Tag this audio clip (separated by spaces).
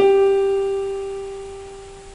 Notes Piano Sol